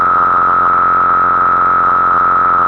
A curious waveshape from my Micromoog, with the Filter Mode set to Tone and Filter Mod by Osc set to Strong. Set the root note to F2 -28 in your favorite sampler.